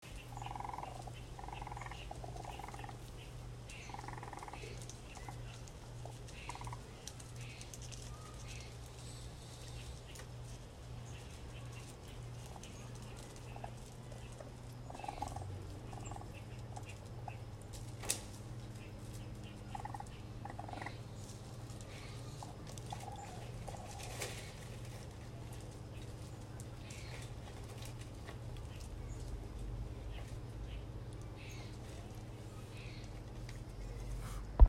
More Raccoon Noises
Nature, Attack, Raccoon, Creature, Atmosphere, Night, Fight, Ambience, Growl, Animal, Outdoors